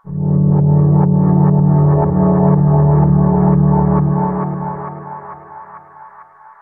Some thick notes from a Nord Modular patch I made through some echos and gates and whatnot. It should loop OK even.